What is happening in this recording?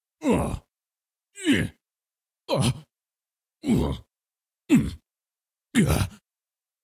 Man Hurt Noises
A man, attacked by a large spider. Or two.
man, male